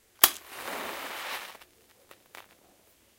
the lightning of a match / encendido de una cerilla
field-recording, ignition, match